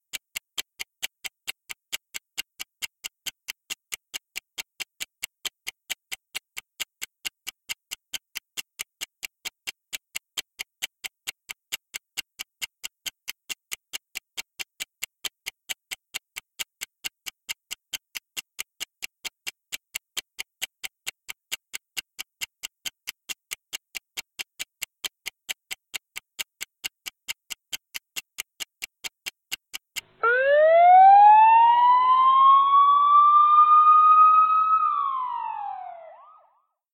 A 30-second ticking timer with an alarm at the end. Good for game shows or trivia games.
tick, countdown, ticking, alarm, count, clock